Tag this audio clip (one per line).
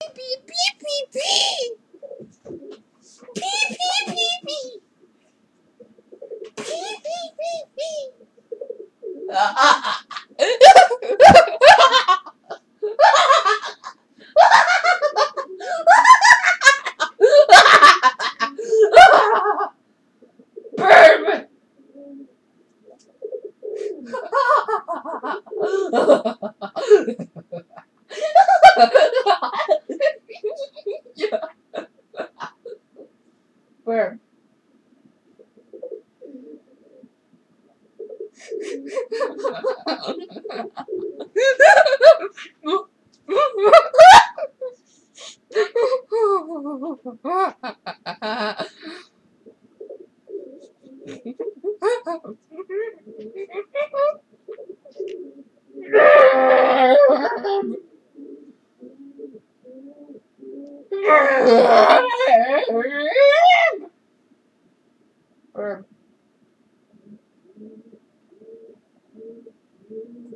audio
bird
birds
cooing
crazy
insane
laughter
noises
pigeon
pigeons
sounds
webcam
weird